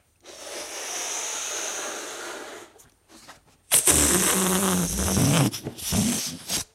balloon fun 1
Blowing a toy balloon and deflating it again by letting the air escape through the mouth piece pressing it a little bit, thus producing a very "human" noise.